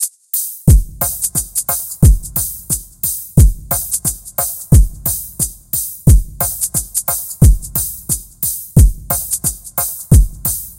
abstract-electrofunkbreakbeats 089bpm-duburby
this pack contain some electrofunk breakbeats sequenced with various drum machines, further processing in editor, tempo (labeled with the file-name) range from 70 to 178 bpm, (acidized wave files)
this is a simple dub beat
slow, delay, percussion, beat, echo, experiment, soundesign, elektro, chill, drum, loop, dub, funk, trip-hop, drum-machine, electro, rhythyhm, downbeat, reverb, downtempo, filter, abstract, processed, reggae